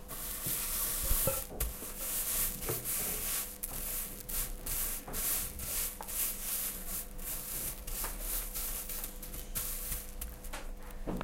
Sounds from objects that are beloved to the participant pupils at the Escola Basica of Gualtar, Portugal. The source of the sounds has to be guessed.